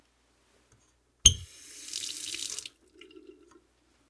water sink turn tap quick short thud squeak water run a little
recorded with Sony PCM-D50, Tascam DAP1 DAT with AT835 stereo mic, or Zoom H2